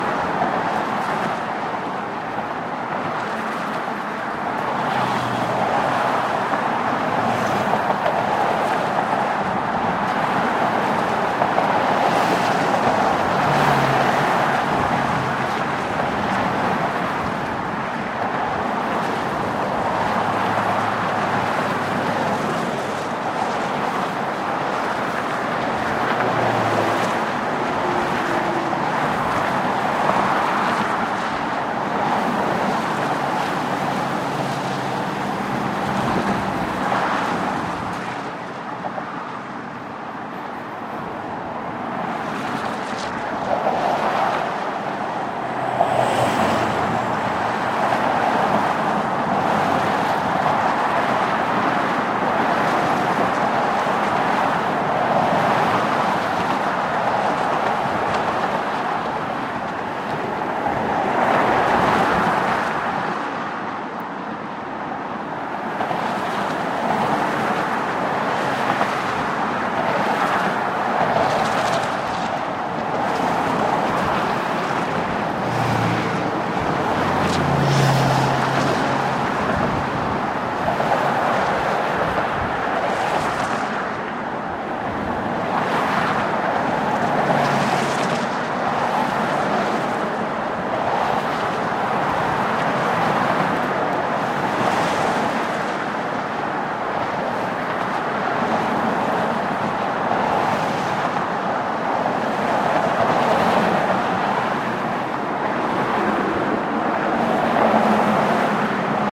GGB 0417 Ambient Lamppost SE89 N
Ambient recording of traffic noise on the Golden Gate Bridge main span, east side pedestrian walkway near the south tower (by lamppost marked "85"). Wind was measured between 7 and 14 mph between SSW and NNW headings. Recorded August 20, 2020 using a Tascam DR-100 Mk3 recorder with Rode NTG4 wired mic, hand-held with shockmount and WS6 windscreen. Normalized after session.
bridge, DR-100-Mk3, field-recording, Golden-Gate-Bridge, mic, noise, NTG4, road-noise, Rode, San-Francisco, Tascam, traffic, traffic-noise, wikiGong, wind, WS6